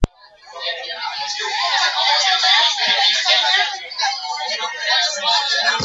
lots of people talking at the same time.